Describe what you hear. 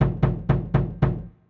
Door knock (Slow)
A slow door knocking sound. (Created with AudioSauna.)
door, knocking, knocks